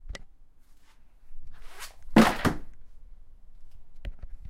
Books are falling down.